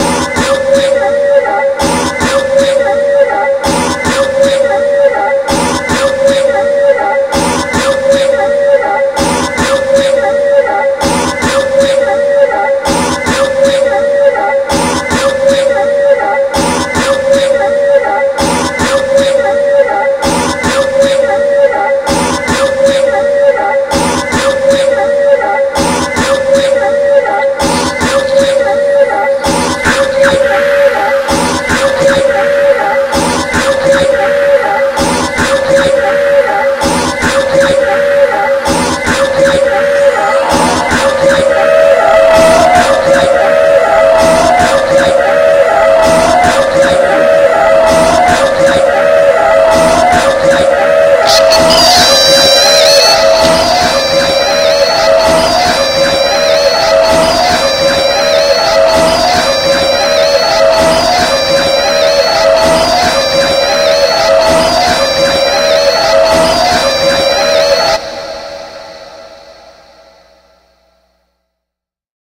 weird loop
The sound is a mic going to a Boss ME-30 on pitch shifter setting, to various other effects, and then to a Boss DD-20 in Sound on Sound mode, then to an Alesis Nanoverb. All the sounds are my voice being effected/looped by the pedals. The beginning part is a cough with another vocal sound layered which sounds similar to a violin...
Recorded direct to sound card.
loop, delay